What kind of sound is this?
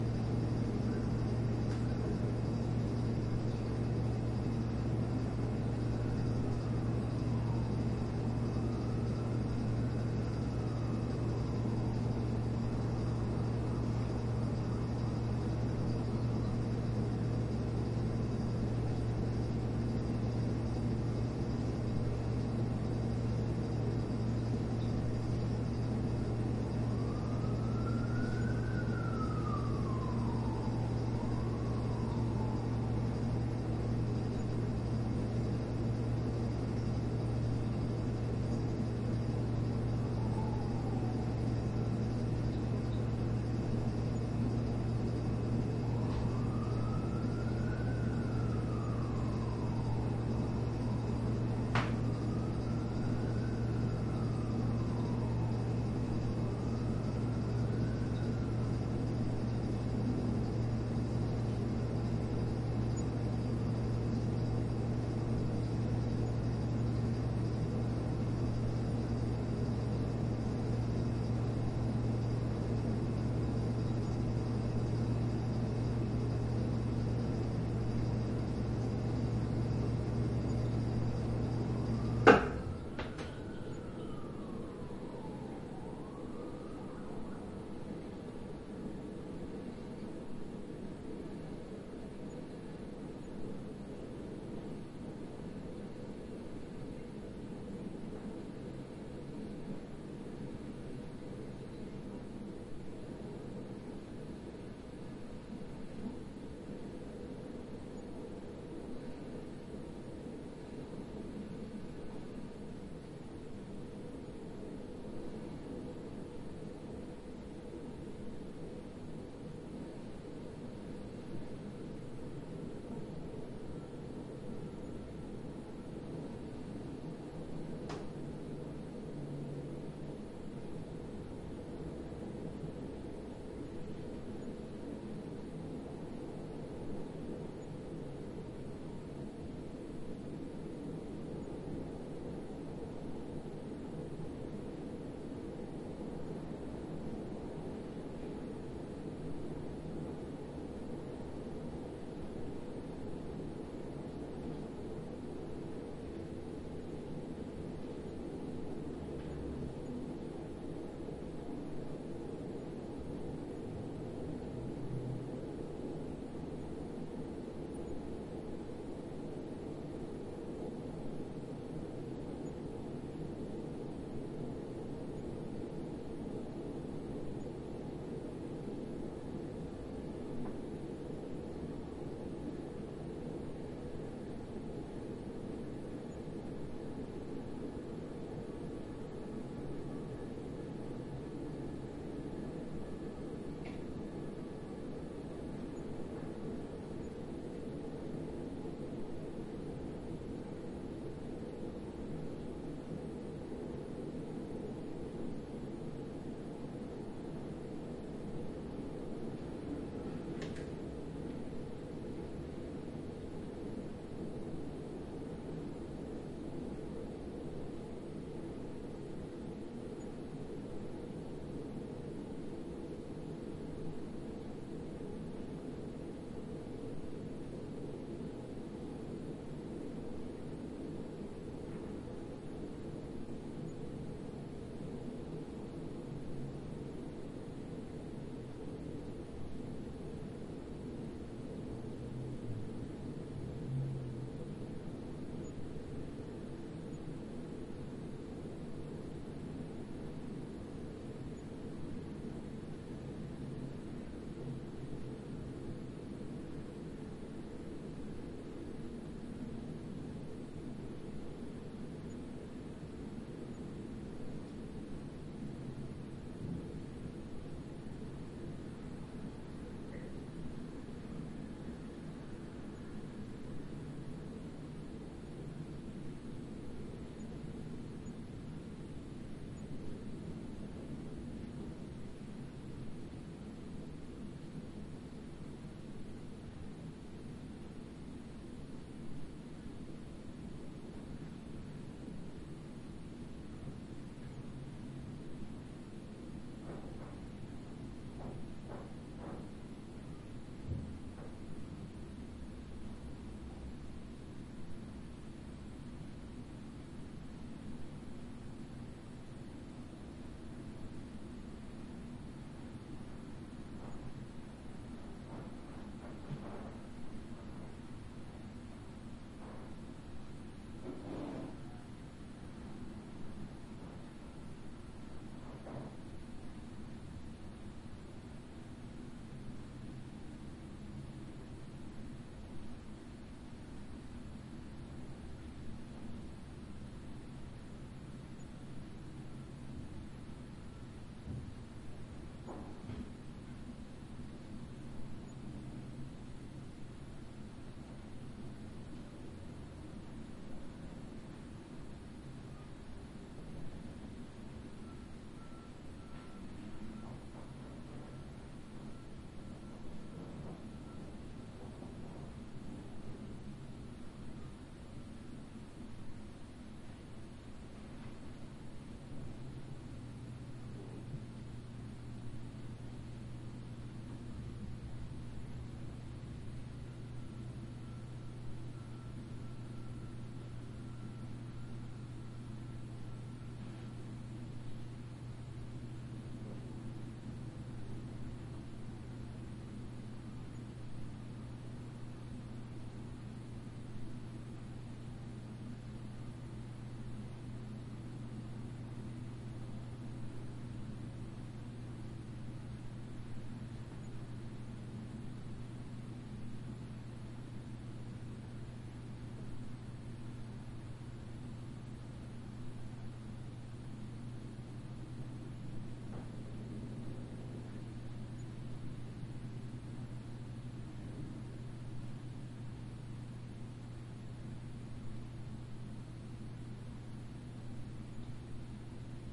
Stereo ambience of a medium sized kitchen in an open apartment. Fridge hum turns off during recording.
Recorded in M/S with a Schoeps CMIT and CMC6 w/ MK8 capsule on a Sound Devices 633. Decoded to L/R stereo.